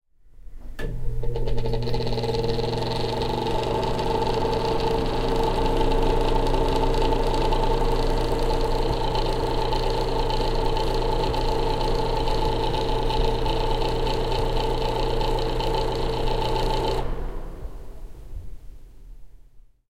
Bathroom Extractor Fan, A
Raw audio of a bathroom extractor fan at a hotel room at Disney's "All Star Music". The fan turns on alongside the light, so the faint flickering of the light turning on can also be heard. The recorder was about 50cm away from the fan.
An example of how you might credit is by putting this in the description/credits:
The sound was recorded using a "H1 Zoom recorder" on 10th August 2017.
fan, toilet